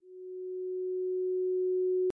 A sound that is a little scary, when actions happen, fits well in a horror game. Made with sfxr.